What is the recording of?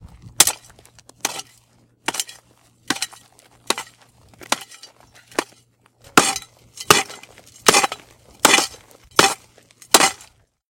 Gathering Stone Resources
Sounds from making holes in the ground to place a mortar in it, it could also be used for preparations of building a tent. What I envision most is though that a real time strategy worker is harvesting coal, iron, metal, gold or stone resources using a pickax or shovel on hard ground. It could also be a massively multiplayer role playing game with the harvesting features.
build, builder, building, constructing, construction, game, gatherer, gathering, gold, harvest, iron, ore, resource, site, stone, stone-age, tool, video-game